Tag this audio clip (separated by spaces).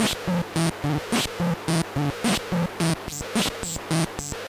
analogue electronic loop loopable Mute-Synth-2 Mute-Synth-II rhythm rhythmic seamless-loop synth-percussion